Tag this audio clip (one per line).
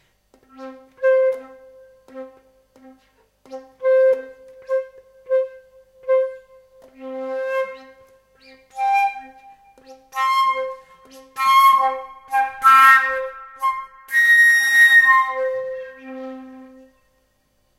Flute
Acoustic
Instruments